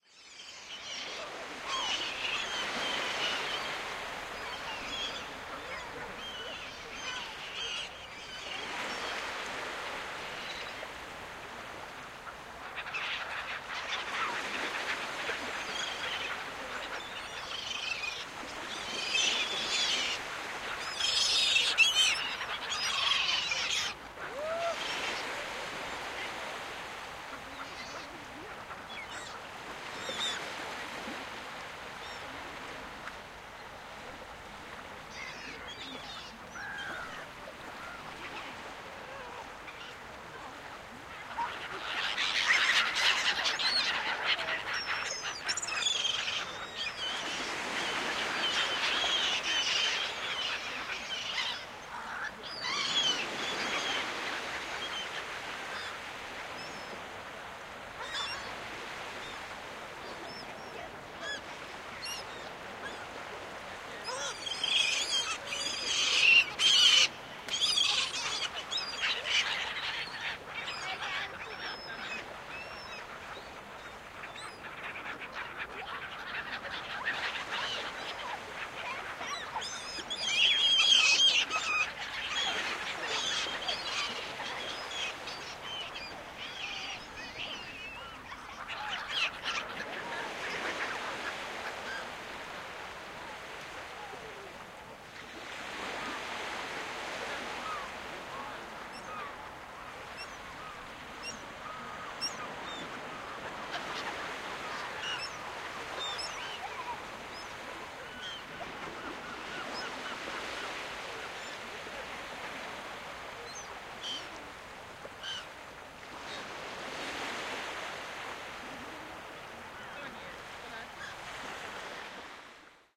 15.02.2014: about 15.00 in Miedzyzdroje. The Baltic coast. Sound of seagulls.
recorded on Martantz PMD661MKII + Shure VP88